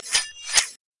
For fight Szenes
recorded in a Hall with an Zoom H4n/designed in AbbletonLive